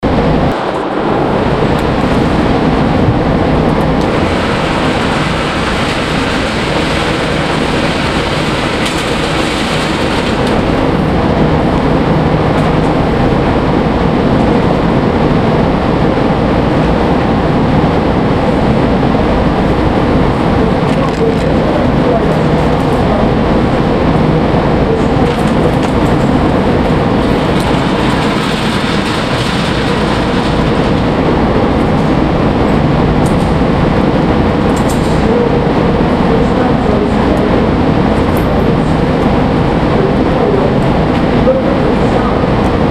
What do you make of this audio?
GunRange Mega12
Retracting target, refilling target and sending out target
22, 9, caliber, facility, gun, indoor, millimeter, nine, range, shots, twenty-two